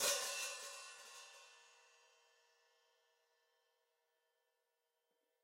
open hi hat 1
Drums Hit With Whisk
Whisk, Hit, Drums, With